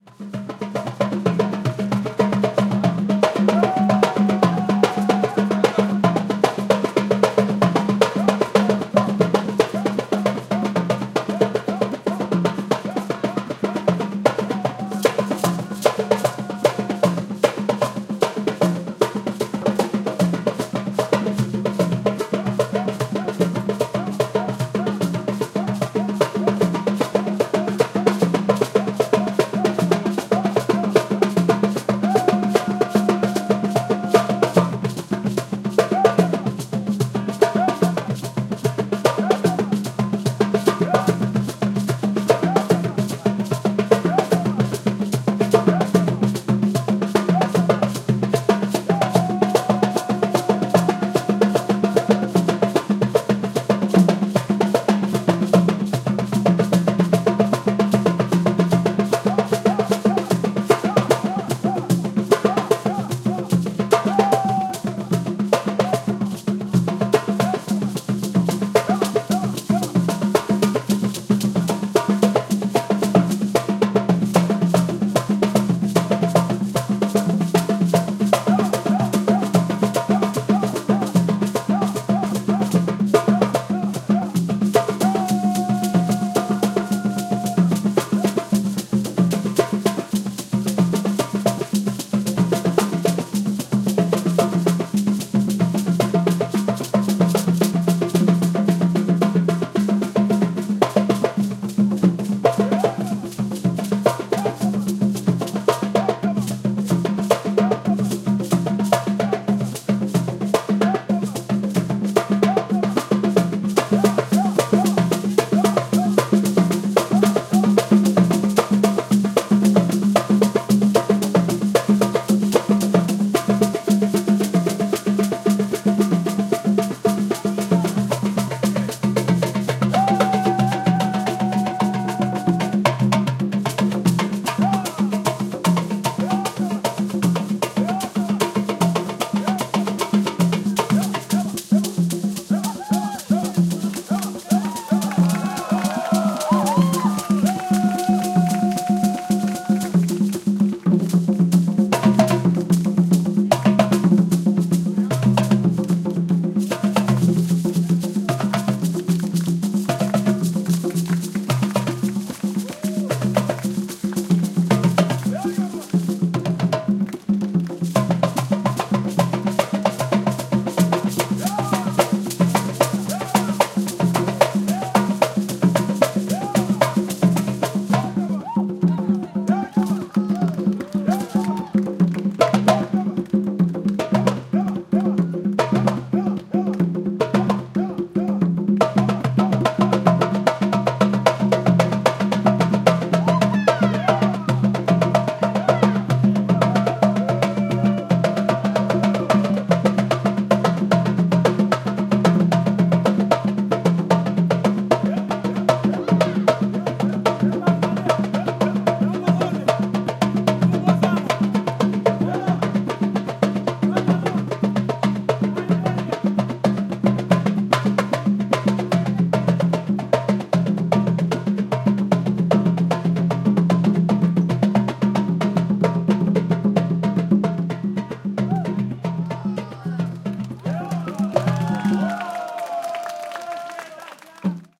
Africa Pavillion Drum Jam
Recording of a rather hot impromptu African drum jam made at the Africa Pavillion, World Expo, Shanghai China.
Sony PCM-D50